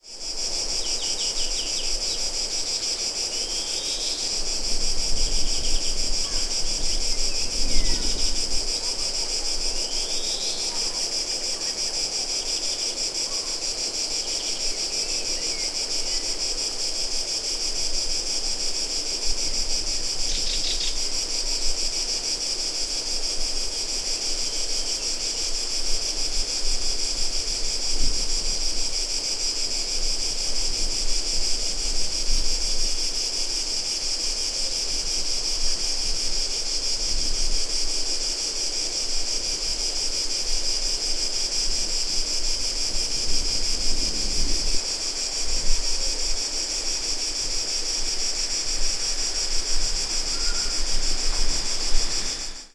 Grillen - viele Grillen, Tag
Ambience with lots of crickets at daytime
Recorded at Vulcano, Italy
day, ambience, field-recording, crickets